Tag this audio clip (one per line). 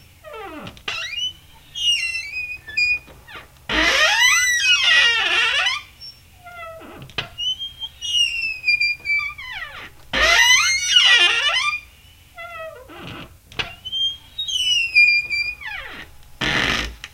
Wooden-hollow-door
Scary
Squeaky-Door